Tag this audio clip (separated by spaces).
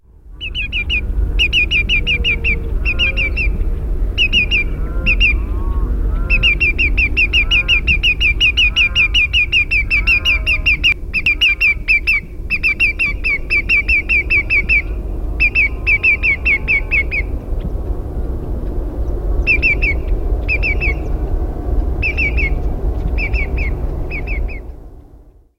greater-yellowlegs tringa-melanoleuca